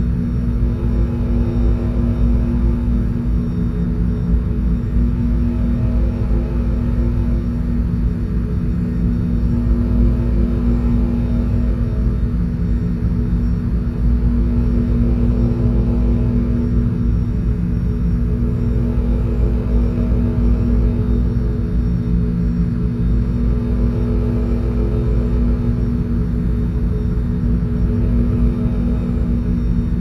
S L 2 Scifi Room Ambience 06
Ambience for a scifi area, like the interior of a space vessel.
This is a stereo seamless loop.
Room, Ambience, Tone, Turbine, Scifi